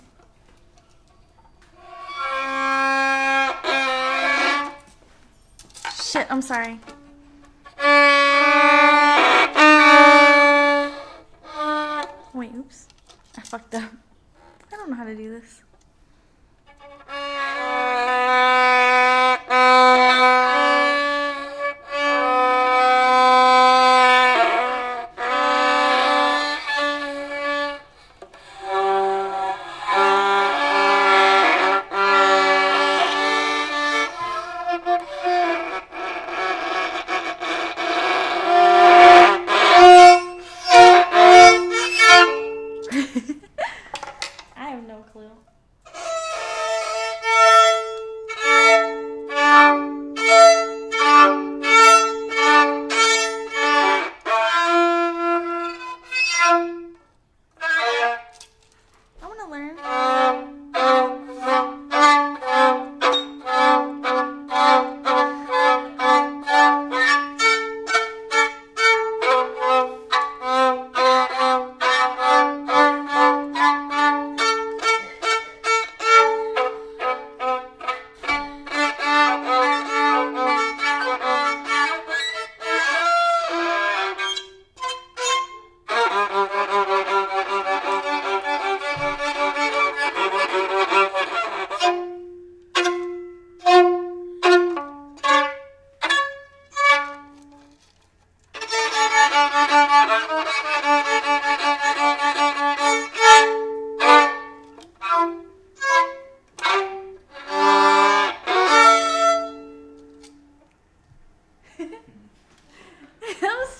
I recorded as 2 females and myself took turns playing and torturing a violin.
bowed, improvized, violin